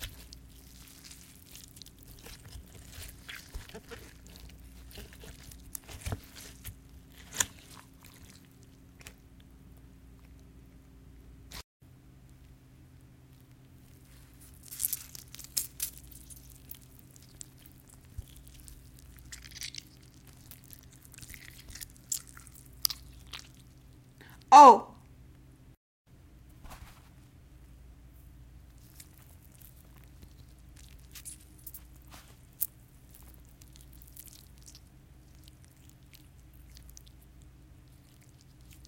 Wet Flesh & Blood Squeeze and Gush
Sounds of wet flesh being squeezed and gushing. Created by squeezing a wet Shammy cloth.
death, foley, squelch, flesh, gore, squeeze, bloodsplat, intestines, horror-fx, horror, horror-effects, splat, blood